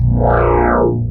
Weird sound effect.
Generated with audacity.
bass, wah, weird-sound